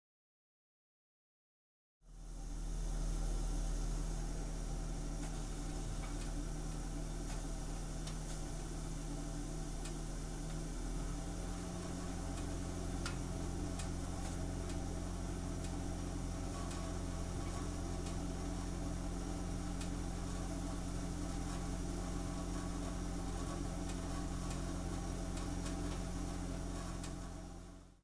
gas-boiler
Sound of gas boiler on.
CZ, burning, Panska, Czech, boiler